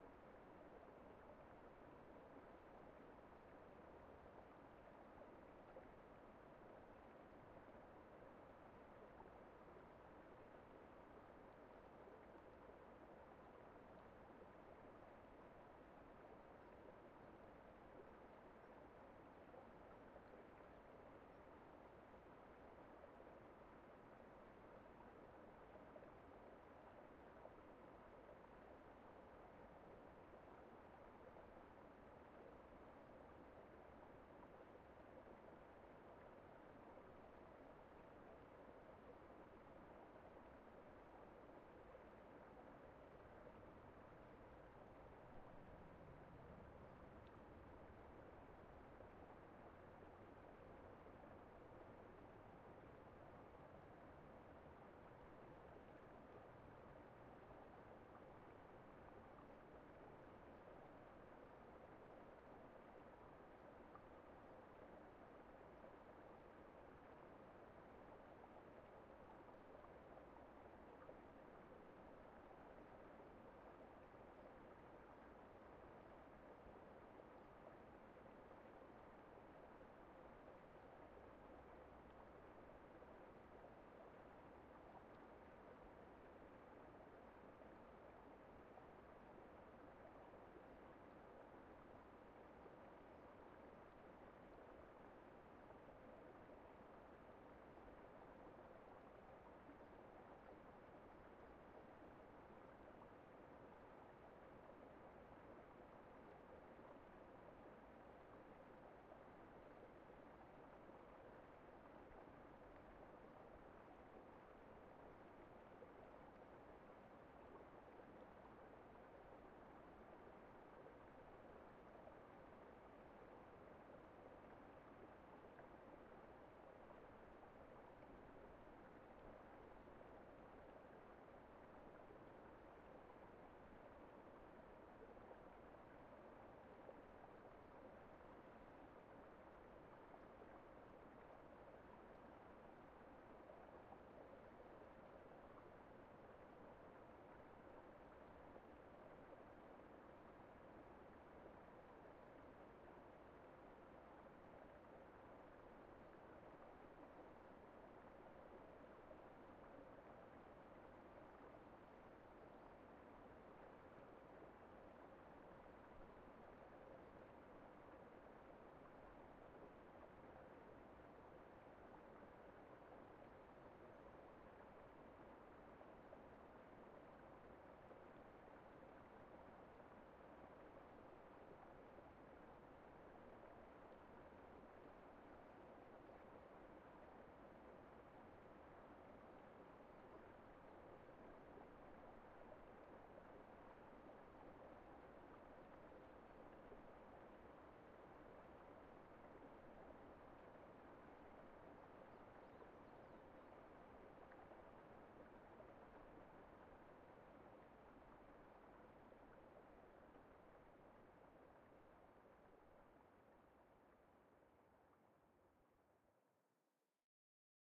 Ambience of what a drowning victim might hear
Sound of a waterfall and surrounding nature, from under the water.
soundscape, ambiance, birds, electronic, hear, ambience, OWI, atmosphere, waterfall, background-sound, ambient, water